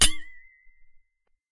Metal water bottle - lifting lid 2
Lifting the lid off a metal water bottle.
Recorded with a RØDE NT3.
Bottle, Foley, Hit, Impact, Metal, Strike, Thermos, Water